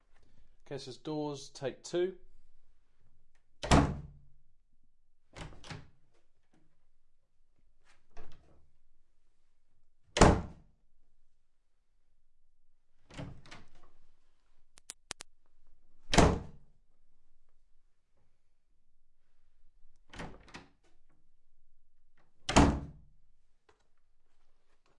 CFX-20130329-UK-DorsetHouseDoors02
House Doors Opening Closing